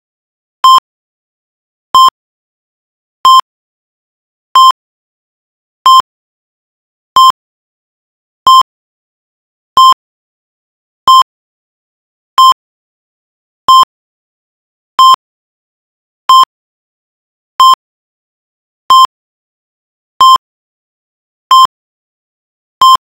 heart rate monitors
A resting heart rate monitor. (Musical Note: C)
rate, heart, monitor